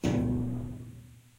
A pane of glass struck with the tips of the fingers.
Impact, Glass, Hollow